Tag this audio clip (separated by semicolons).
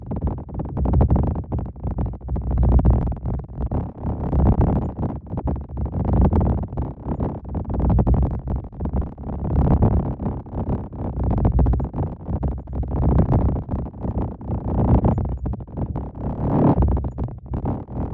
idm; experimental; modular; puredata; rare; pd; analog; ambient